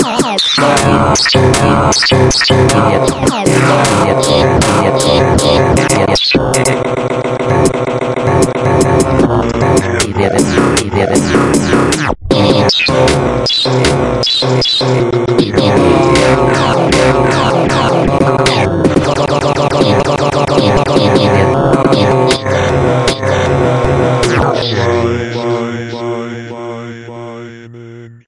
78 crazy bw soundsz
fruity loops, loop, samples
loop, loops, samples